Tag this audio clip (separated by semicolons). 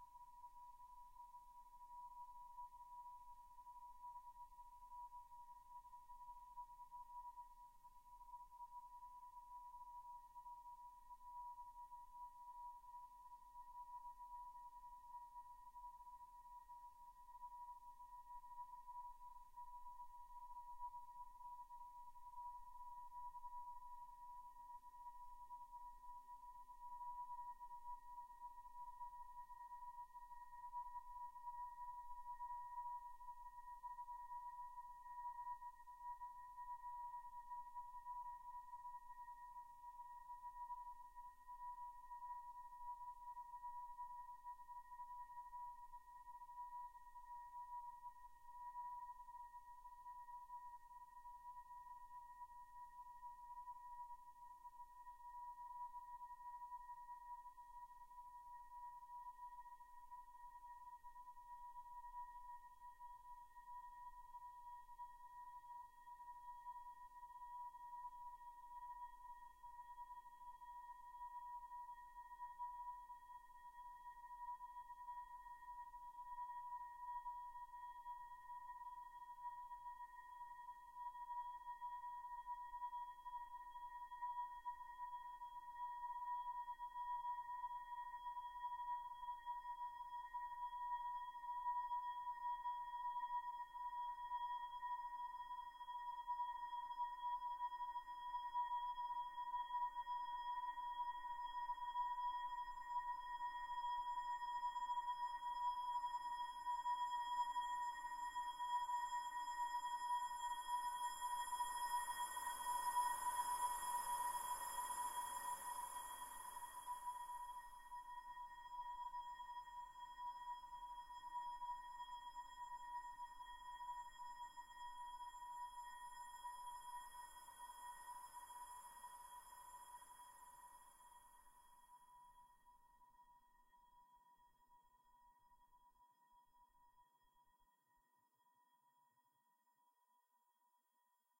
nightmare; sinister; terror; background; creepy; haunted; phantom; suspense; dramatic; eerie; scary; terrifying; spooky; background-sound